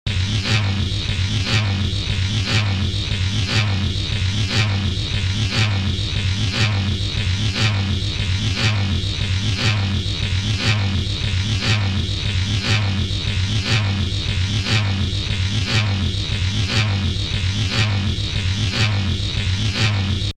Sounds that I recorded from machines such as tyre alignments, hydraulic presses, drill presses, air compressors etc. I then processed them in ProTools with time-compression-expansion, reverberation, delays & other flavours. I think I was really into David Lynch films in 2007 when I made these...
processed, recording